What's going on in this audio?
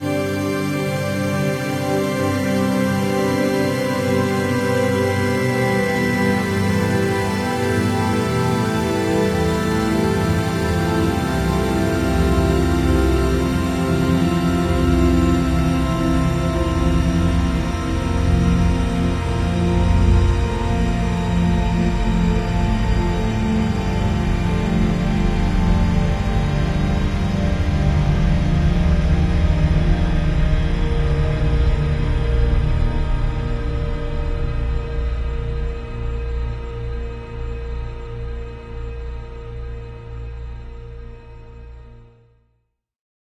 Made by processing real and synthetic sounds.
harmonics, descending, organ, atmospheric, synthetic-atmospheres, blurred